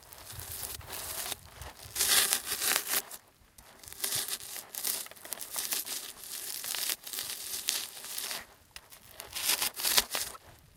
Grinding Styrofoam
Grinding a large styrofoam block with a rock.
Recorded with Zoom H1.
field-recording, noise, sound-design, styrofoam